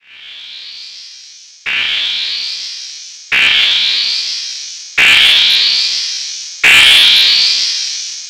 KOUDSI Linda 2015 2016 alarm
*After attempting to open the metallic door, you hear the noise of a shrilling alarm. In just 5 minutes , you are surrounded by a hord of aliens armed to the teeth. Game over.*
For this final sound, I generate a tone with a sinusoidal waveform and add some effects (Wahwah, Reverberation). I also changed the speed, repeated the same sound 4 times to create the illusion of an alarm and I changed the pitch to be more acute.
Description du son :
V : Continu varié / V’’ : Itération varié
1) Masse: groupe nodal (plusieurs sons complexes)
2) Timbre harmonique: brillant, éclatant
3) Grain: rugueux lorsque le son part dans les aigus vers la fin
4) Allure: pas de vibrato.
5) Dynamique: l’attaque du son est graduelle, elle commence doucement puis se fait de plus en plus violente et stressante.
6) Profil mélodique: les variations sont serpentines, la séparation des notes est fluide.
7) Profil de masse: calibre.
alarm, sci-fi, stressful